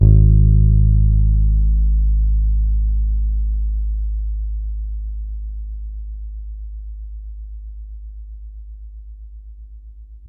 This is an old Fender P-Bass, with old strings, played through a Fender '65 Sidekick amp. The signal was taken from the amp's line-out into the Zoom H4. Samples were trimmed with Spark XL. Each filename includes the proper root note for the sample so that you can use these sounds easily in your favorite sample player.
string, sidekick